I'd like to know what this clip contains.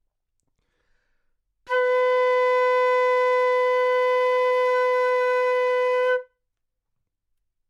Part of the Good-sounds dataset of monophonic instrumental sounds.
instrument::flute
note::B
octave::4
midi note::59
good-sounds-id::2997
B4, neumann-U87, multisample, good-sounds, single-note